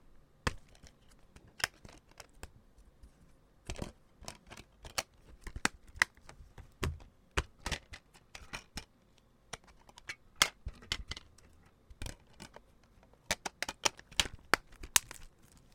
Opening DVD cases, removing the DVD and putting them back. One case is a "double disc" case, with a flimsy plastic disc holder flopping around inside.